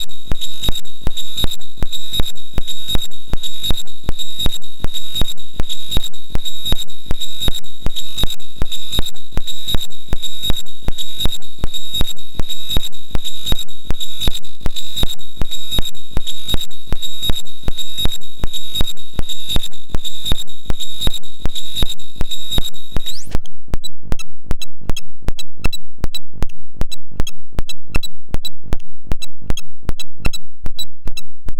Rhythmic feedback loop generated from a No-Input Mixer